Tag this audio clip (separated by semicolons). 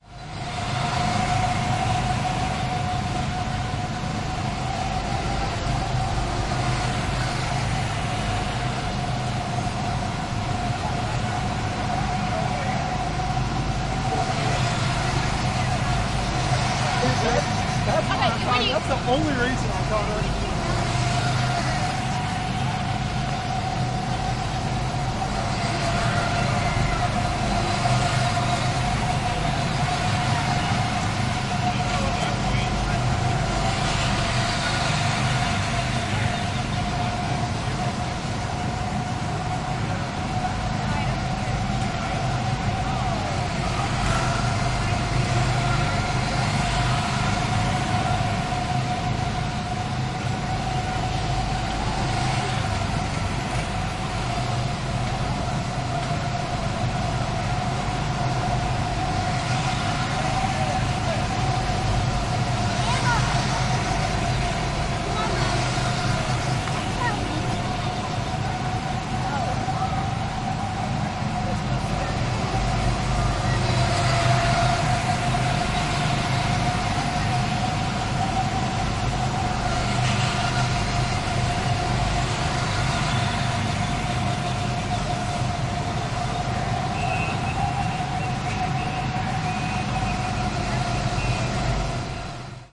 ADPP; attraction; engine; field-recording; go; karts; machine; motor; multiple; race; racing; sports; tourism; tourist